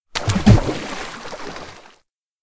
Water Splosh
A large piece of heavy metal thrown into a river.
Splosh, water, river, Splash, metal, sinking